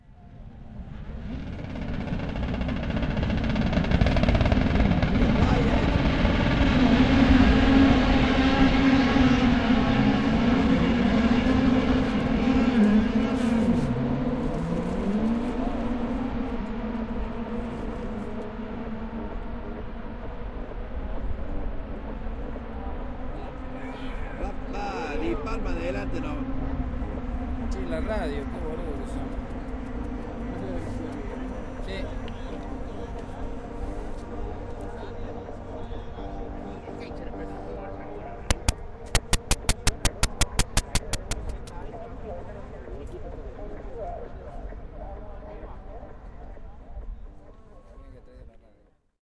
TC2000.08.Cabalen.LaRadio
Howling engines far away.
Diego (my partner at car-races, likes to chat and make friends) finally found someone to chat endless with: "The-Commentator-That-Only-Speaks-Boludeces”
A tiny drummer (thanks ERH) or a butterfly trapped inside (thanks HammerKlavier) my telephone
car, field-recording, male, race, signal, sound, speech, zoomh4